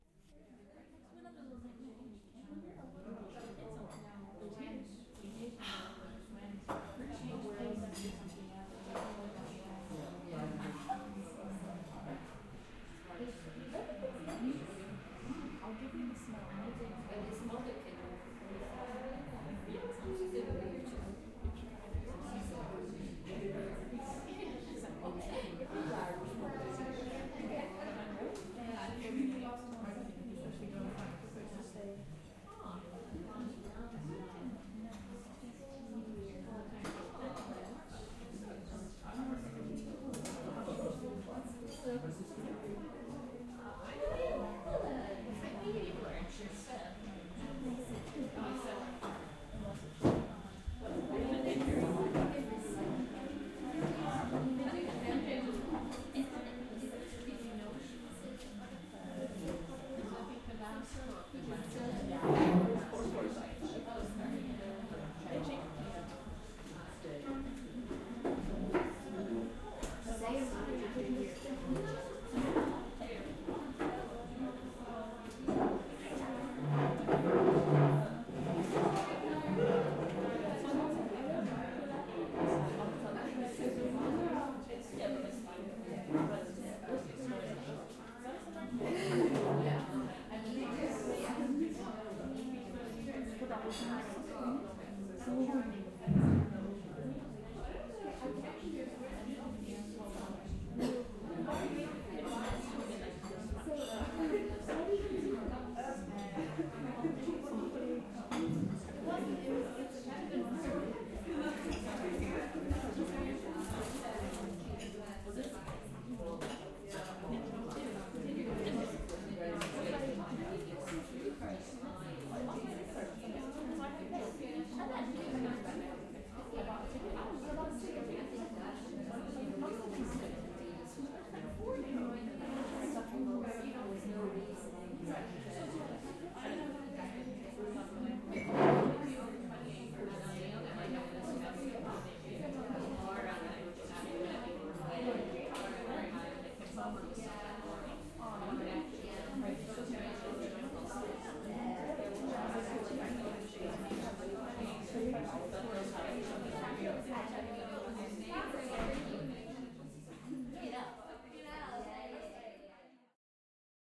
Recorded in Dublin with a Zoom h4n